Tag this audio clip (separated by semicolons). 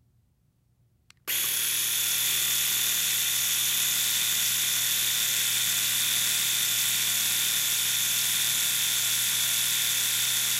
electric-razor; machine; mechanical